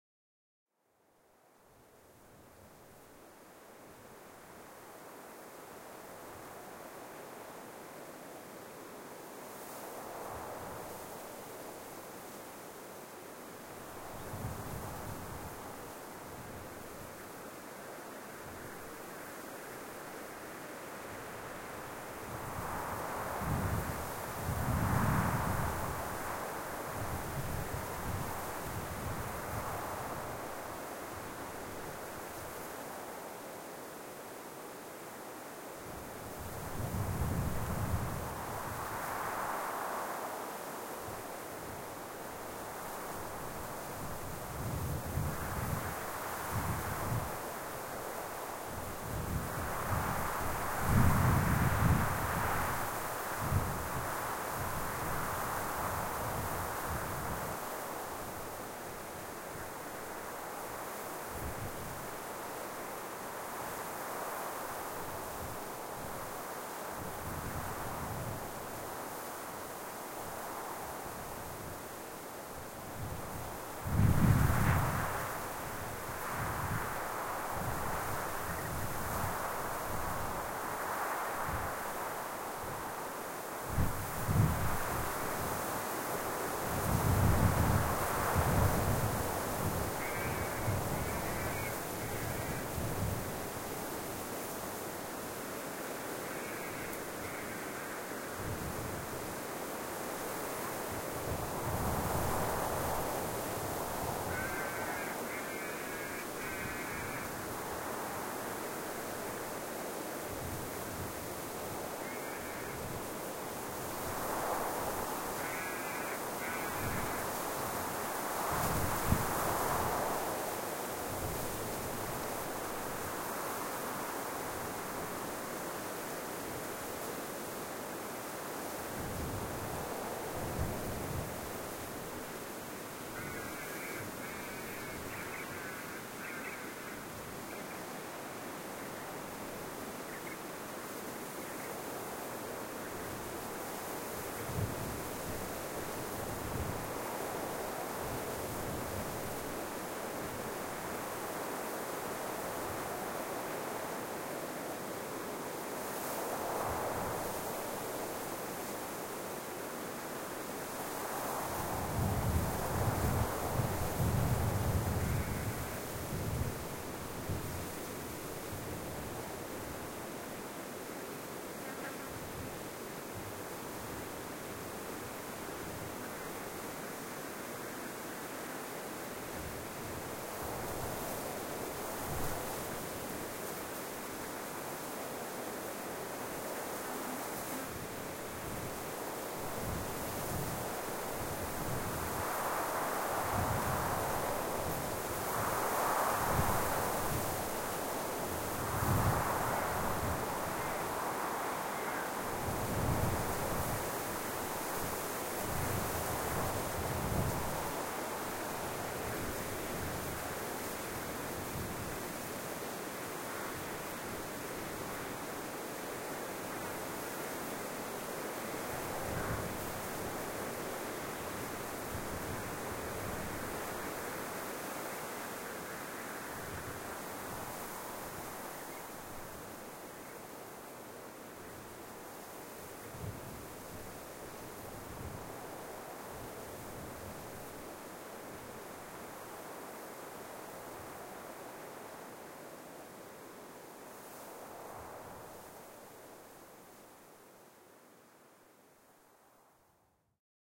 Spinifex Wind #1
Desert wind whispering through the spinifex!
Recorded during the daytime at a sacred site on Warlpiri country.
MS stereo with sennheisser mics.
I have EQed this recording to take out the rumbly bottom end and emphasise the whispering sounds of the wind.
Enjoy